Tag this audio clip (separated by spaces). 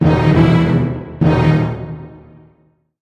cinema cinematic drama film movie orchestral suspense tension